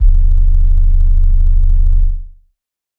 fake analog bass 1b
deep electronic bass sound
bass, electronic